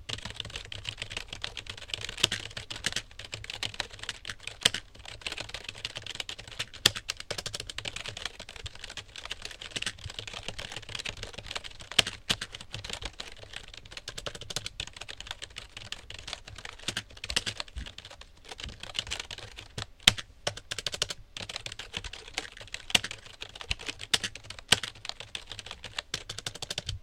A frantic typingsound. The individual keystrokes are fairly slurred together, giving the sample a slight out-of-control feel. This sort of sample would go well layered in the background of an oppressive office environment.
recording, typing